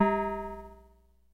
Nord Drum TOM 4
Nord Drum mono 16 bits TOM_4
Drum, Nord, TOM4